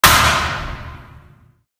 VSH-46-plastic-crack-bright-slam-long
Plastic foley performed with hands. Part of my ‘various hits’ pack - foley on concrete, metal pipes, and plastic surfaced objects in a 10 story stairwell. Recorded on iPhone. Added fades, EQ’s and compression for easy integration.
crack, fist, hand, hit, hits, human, kick, knuckle, plastic, pop, slam, slap, slip, smack, squeak, sweep, thump